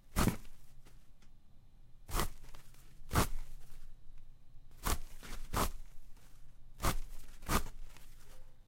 tissue pulls
Pulling tissues from a box.
box, kleenex, napkin, pulling, pulls, tissue